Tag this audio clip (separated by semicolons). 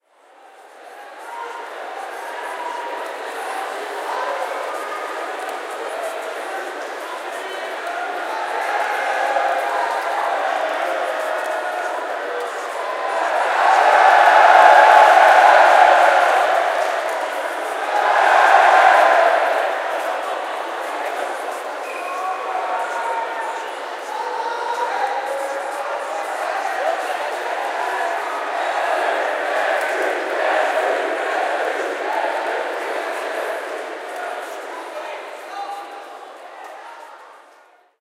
event; sports; people; audience; hall